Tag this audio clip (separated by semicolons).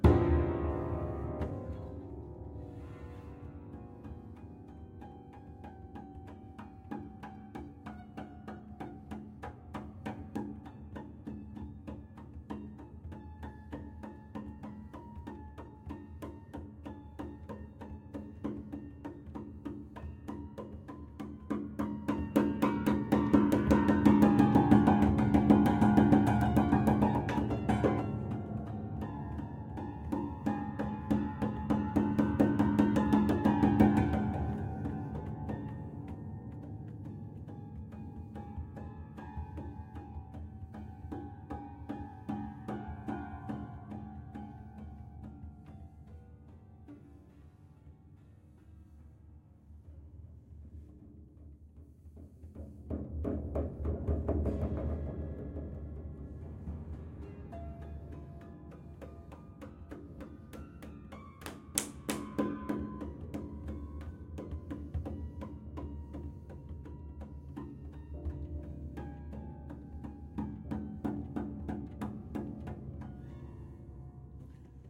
acoustic; effect; fx; horror; industrial; piano; sound; sound-effect; soundboard